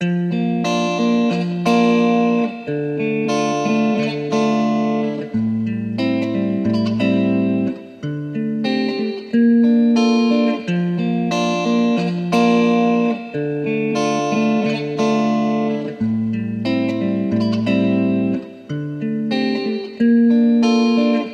indie rockin'4.2
Please use it sample and make something good :-)
If you use this riff please write my name as a author of this sample. Thanks. 90bpm
good, calm, tranquil, emo, peaceful, satan, mellow, vs, phrase, film, angel, rock, guitar, indie, cinematic, movie, dramatic, repetition, relaxed, plucked, evil